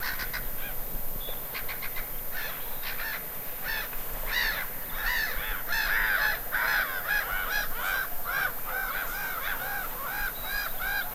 A large noisy group of black-headed gulls at their nestingsite. The sounds are harsh and almost like screams or cries. There are the sounds wind in the trees and of water in the background. Minidisc recording March 8th 2007 at Fairburn Ings reserve England.